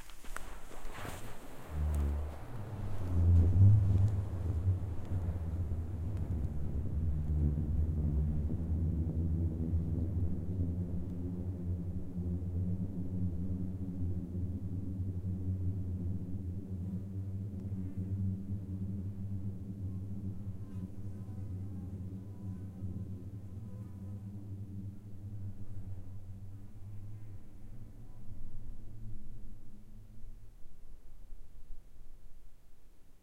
KELSOT25 long quiet receeding slide
Booming sound created via an avalanche on Kelso Dunes.